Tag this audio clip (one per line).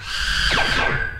mechanical
robot
robotic
machine
industrial
loop
machinery